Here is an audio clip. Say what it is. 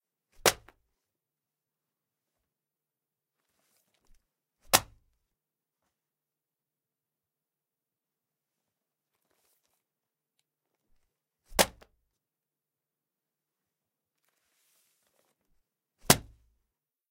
Throwing the notepad on to wood chair
Recording of the throwing a notepad on wood chair.
4 times throwing a notepad on wood chair.
With no reverb, close perspective. Recorded with AKG C3000
book, hit, impact, notebook, notepad, paper, script, wood